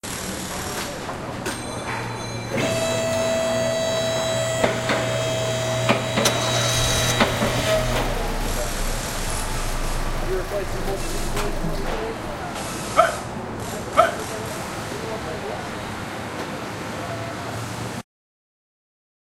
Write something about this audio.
Auto Shop Soundscape
soundscape of an auto shop